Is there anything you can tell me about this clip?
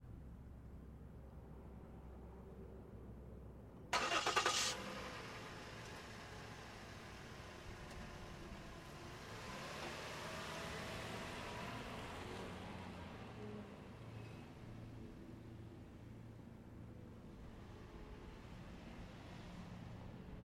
Car Start Drive 2
2007 Ford Edge starting and driving away in a parking lot.
edge, start, driving, car, leaves, automobile, ford, pavement, engine, past